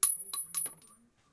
Bullet Casing On Concrete 1
Bullet Casing On Concrete
bullet, casing, concrete, gun, shell